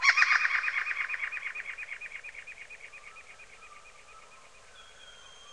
reinsamba Nightingale song happydub3-rwrk
reinsamba made. the birdsong was slowdown, sliced, edited, reverbered and processed with and a soft touch of tape delay.
ambient, animal, bird, birdsong, delay, dub, echo, effect, electronic, funny, fx, happy, lol, lough, natural, nightingale, reggae, reverb, score, soundesign, space, spring, tape